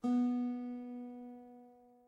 Guitar Pluck
A single pluck from an acoustic guitar.
Strum, String